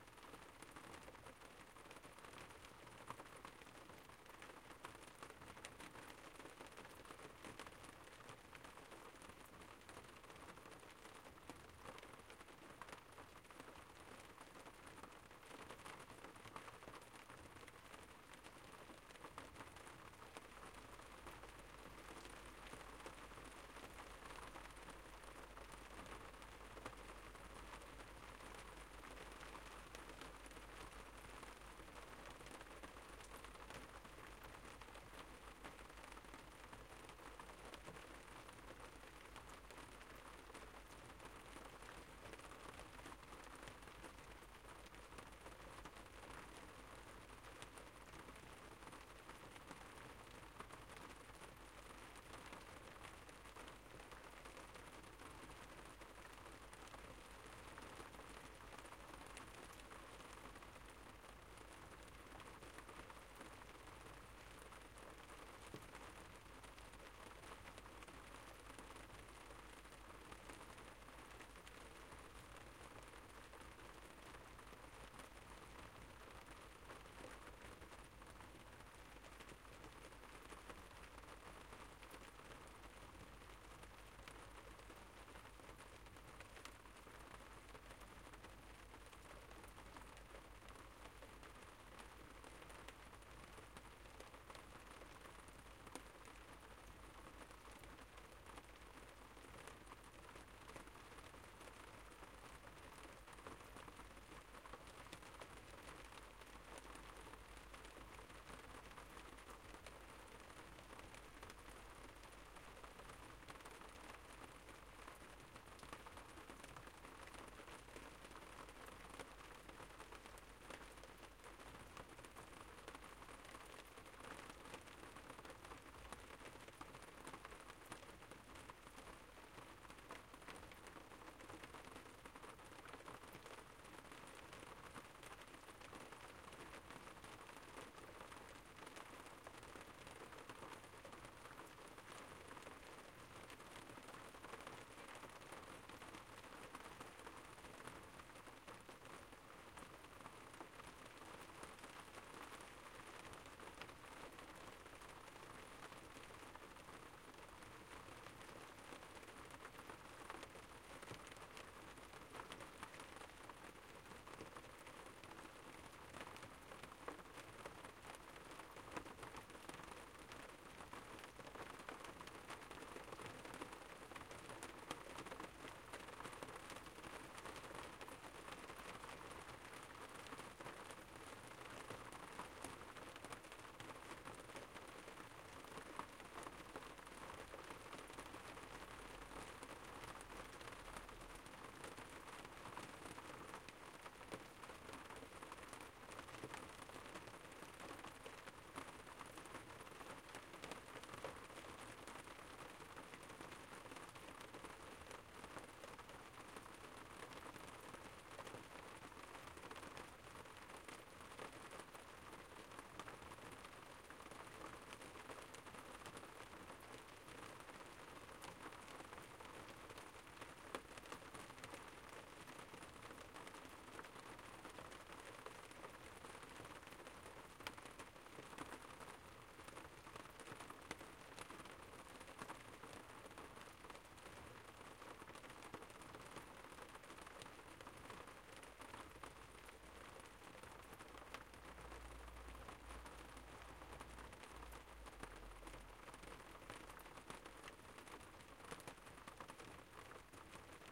Rain Loop 3
Loopable rain
Olympus LS-12, internal capsules
field-recording, loop, loopable, nature, rain, rainfall, shower, sprinkle, weather